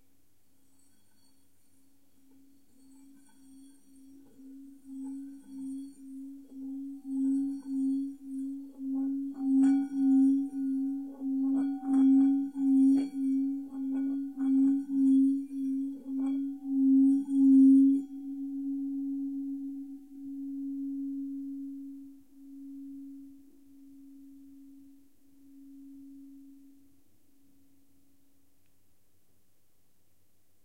Tibetan bowl rubbing rim
Unusual sustained ringing reached by rubbing the Tibetan bowl rim.
bowl, field-recording